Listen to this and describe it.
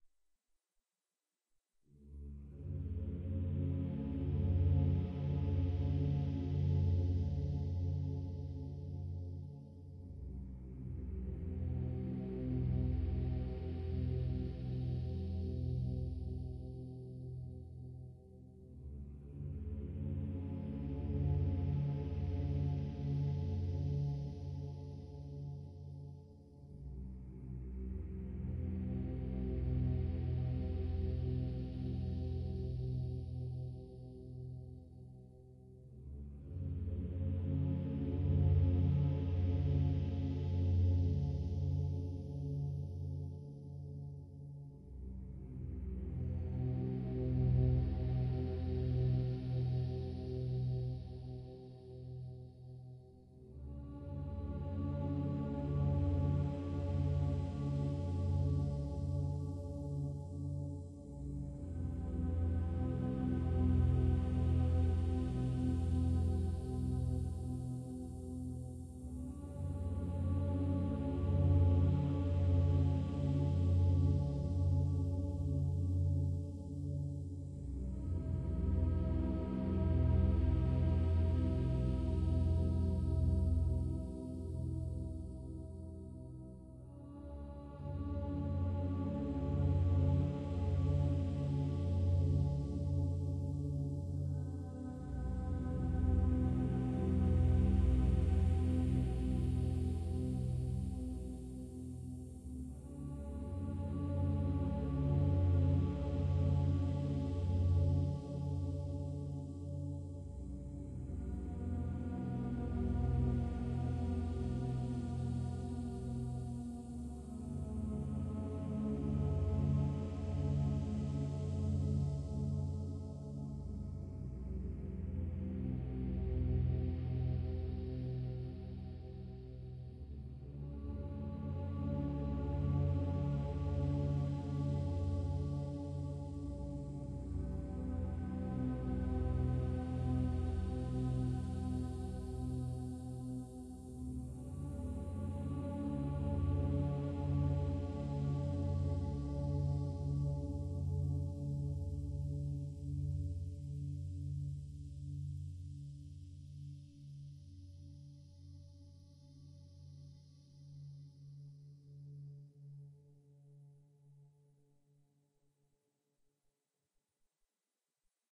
relaxation music #46
Relaxation Music for multiple purposes created by using a synthesizer and recorded with Magix studio. Edited with Magix studio and audacity.
waves, meditative, meditation, relaxing, relaxation